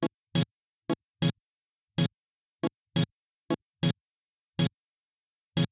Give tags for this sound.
glitch; noise

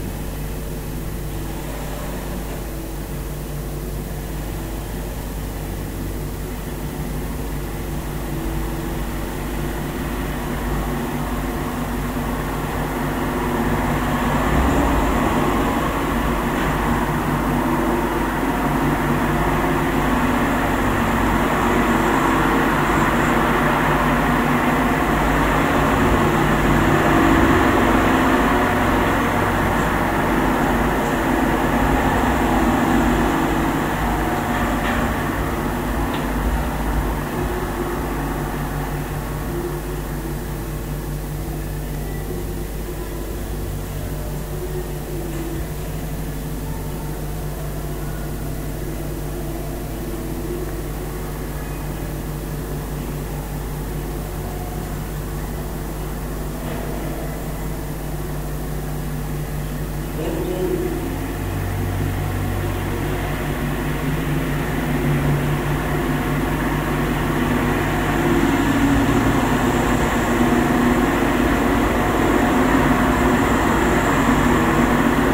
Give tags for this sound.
sony-pcm-d50; schertler; bronze; wikiGong; sweden; field-recording; sculpture; sample; stockholm; DYN-E-SET; media; soundwalk

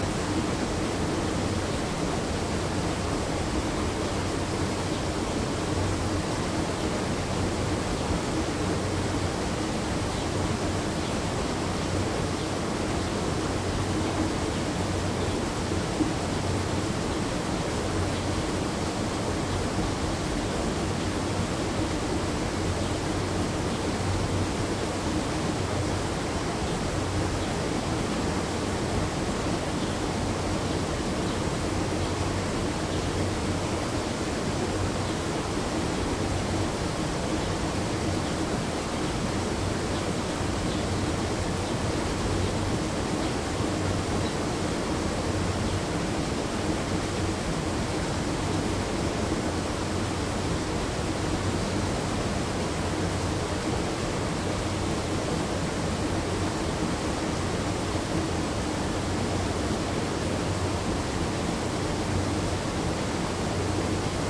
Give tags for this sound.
Brandenburg; H2; zoom; field-recording; watermill; old; mill; nature

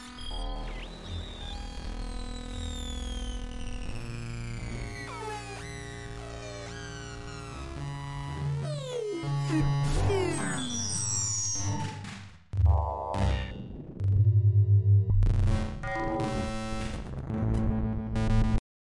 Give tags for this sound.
digital; echo; electronic; glitch; modular; noise; strange; synth; synthesizer; weird

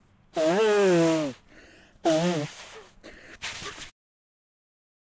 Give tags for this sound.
field; Human-noise; OWI; recording

